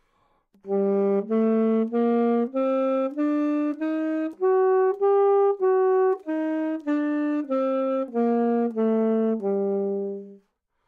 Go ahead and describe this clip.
Sax Alto - G minor
Part of the Good-sounds dataset of monophonic instrumental sounds.
instrument::sax_alto
note::G
good-sounds-id::6857
mode::natural minor
alto, Gminor, good-sounds, neumann-U87, sax, scale